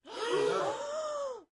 breath group shocked1
a group of people breathing in rapidly, shock-reaction
shock, tension, shocked, suspense, air, breath